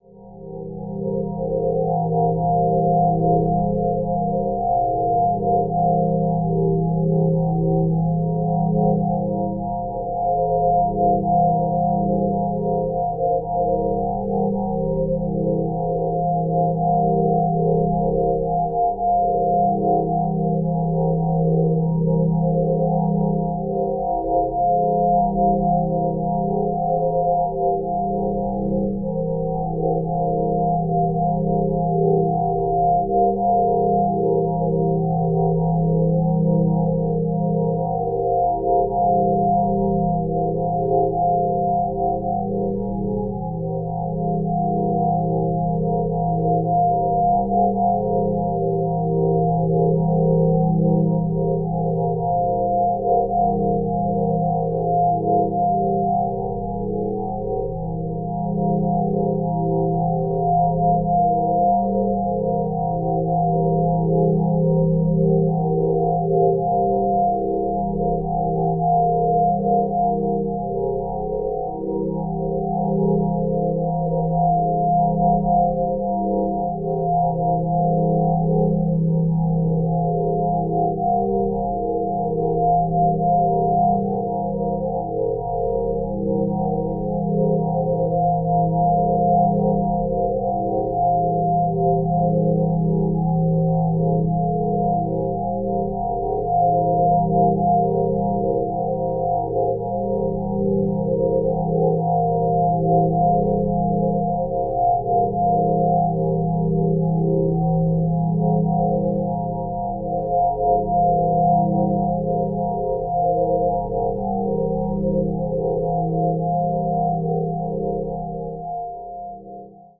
This sample is part of the "SineDrones" sample pack. 2 minutes of pure ambient sine wave. Dense weird horror and dark atmosphere. A bit higher frequencies than 'Sine Drone 01'.
ambient, atmosphere, drone, reaktor, sine-waves